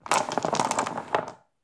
Dice sounds I made for my new game.